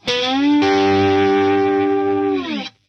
Slide guitar fast G 5 chord.
Recorded by Andy Drudy.
Seaford East Sussex - Home Studio.
Software - Sonar Platinum
Stereo using MOTU 828Mk 3 SM57 and SM68
Start into a Marshall TSL1000
Date 20th Nov - 2015